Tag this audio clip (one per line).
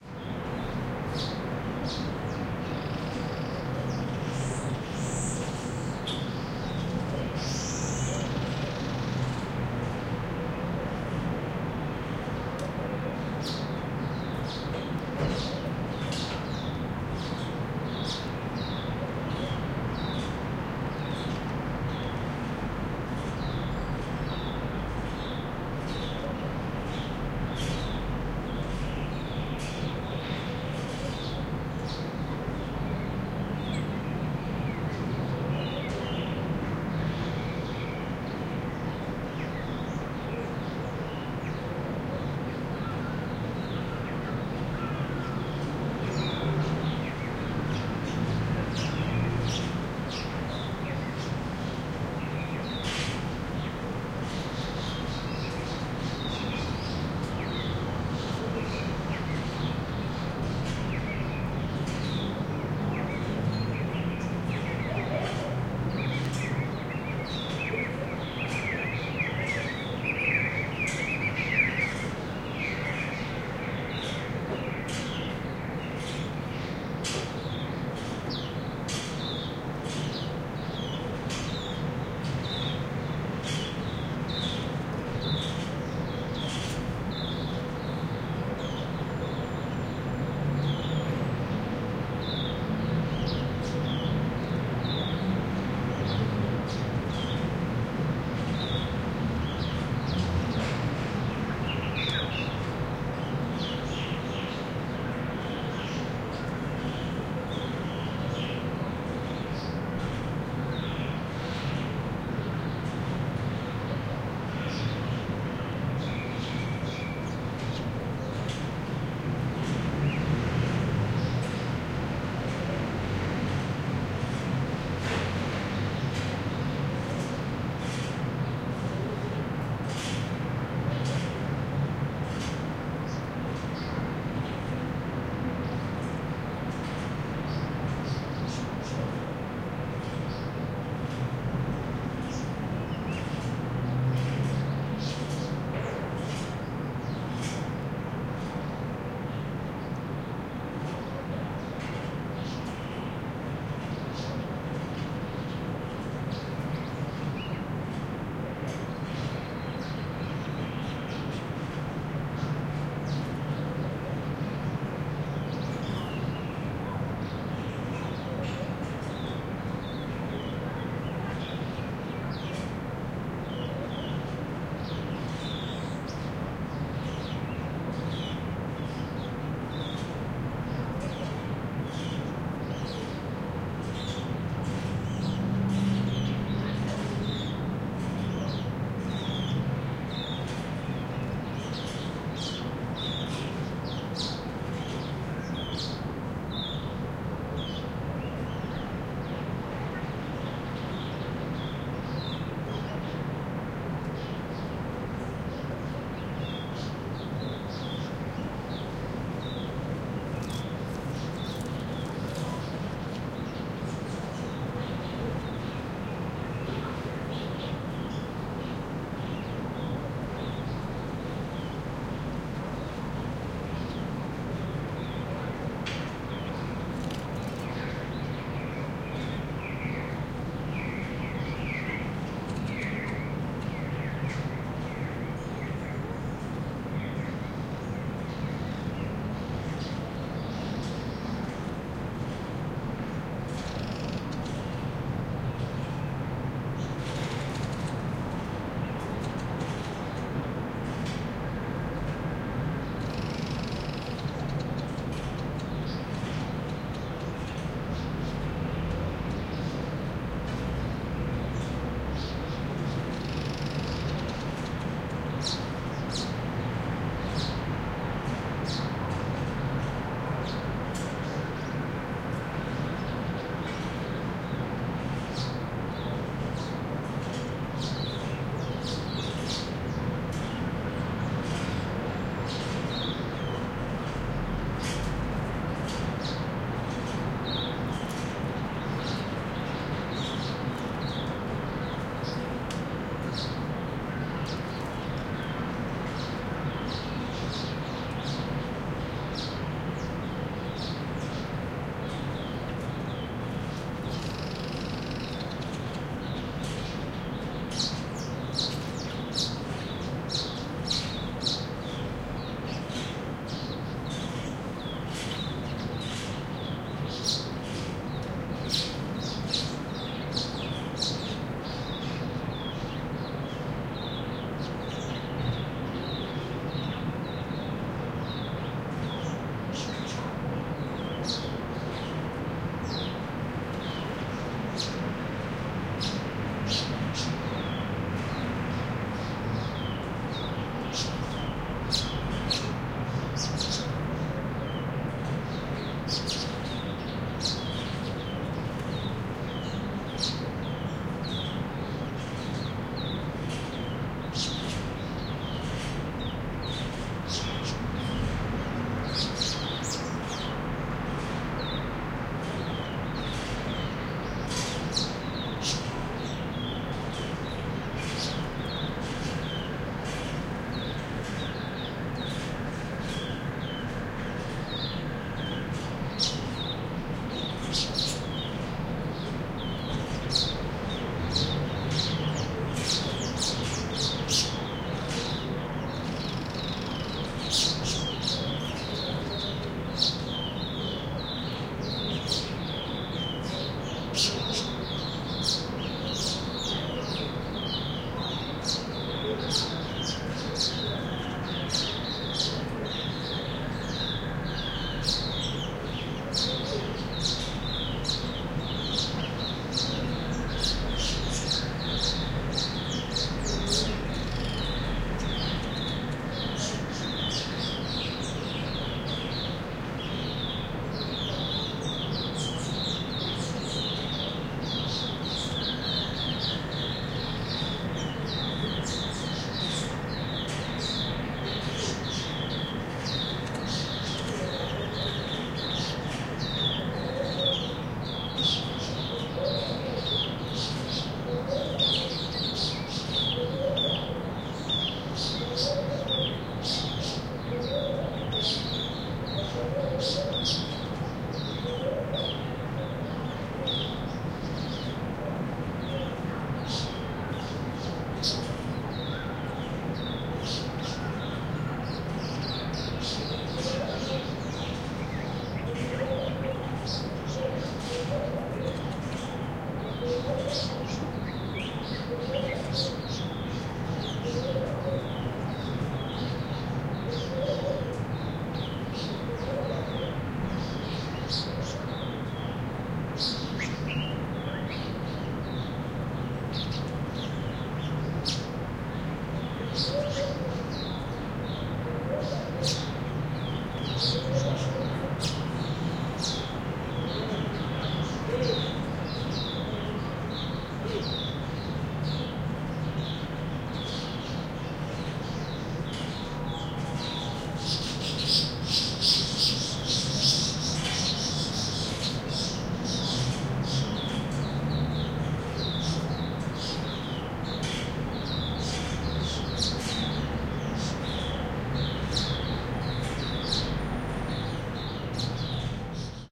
background
spring
suburban
suburb
soundscape
Pretoria
outside
city
birds
ambient
cars